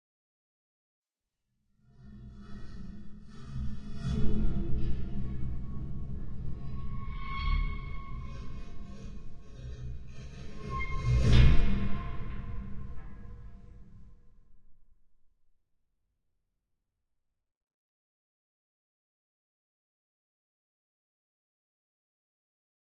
Sound made by bowing a detuned guitar tied to a clothes hanger. Recorded with two contact microphones. No effects were added, the apparent reverb tails are the waves resonating within both the guitar and the metallic clothes hanger.